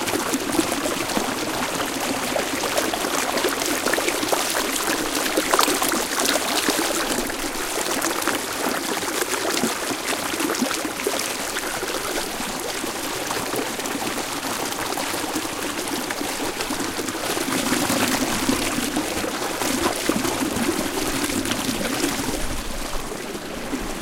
Same series, just clip 3.
Just sounds of water rushing through a small space of rocks at Mckinney Falls State Park outside Austin, Texas. The ambient air temperature was about 90 and the water temperature was probably around mid 80s. There was little to no wind and the humidity was around 25-30%.
Recording chain: AT822 microphone -->minidisc player
austin, h2o, parks, mckinney-falls, travis-county, texas, outdoors, falls, water, mckinney, park, rolling, rocks